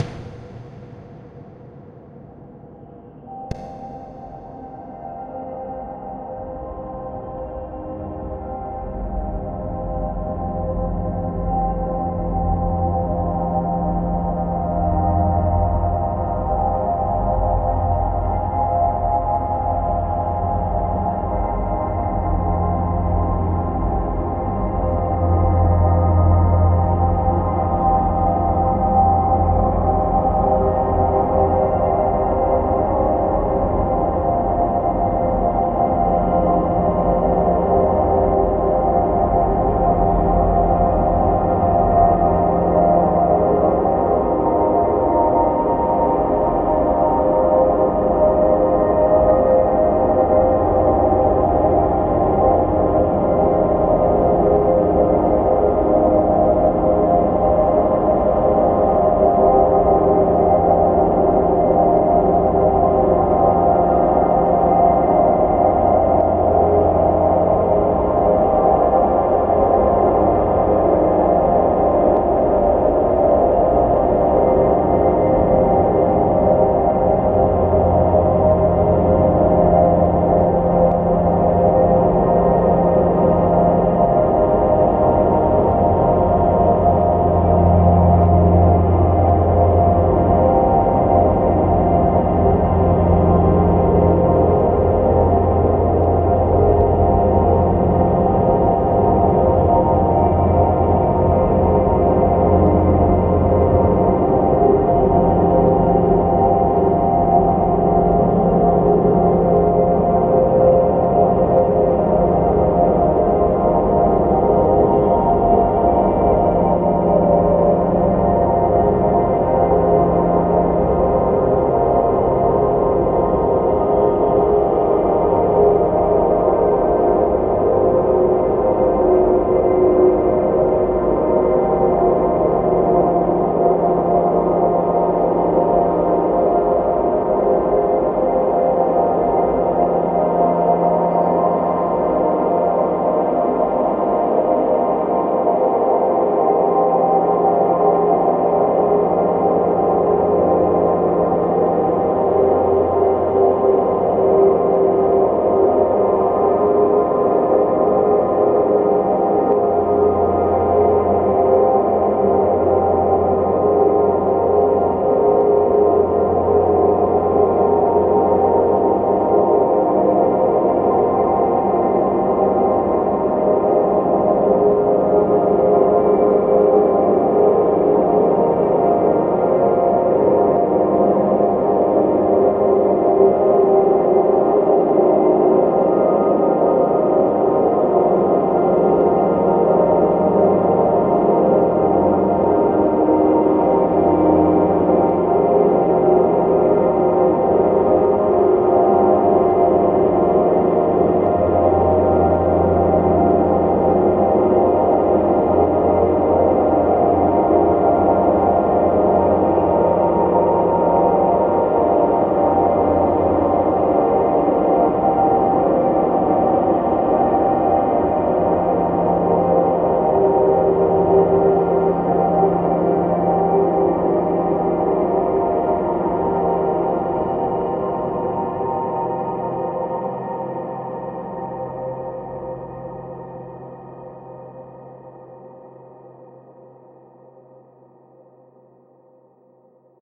LAYERS 011 - The Gates of Heaven-54
LAYERS 011 - The Gates of Heaven is an extensive multisample package containing 128 samples. The numbers are equivalent to chromatic key assignment. This is my most extended multisample till today covering a complete MIDI keyboard (128 keys). The sound of The Gates of Heaven is already in the name: a long (exactly 4 minutes!) slowly evolving dreamy ambient drone pad with a lot of subtle movement and overtones suitable for lovely background atmospheres that can be played as a PAD sound in your favourite sampler. At the end of each sample the lower frequency range diminishes. Think Steve Roach or Vidna Obmana and you know what this multisample sounds like. It was created using NI Kontakt 4 within Cubase 5 and a lot of convolution (Voxengo's Pristine Space is my favourite) as well as some reverb from u-he: Uhbik-A. To maximise the sound excellent mastering plugins were used from Roger Nichols: Finis & D4. And above all: enjoy!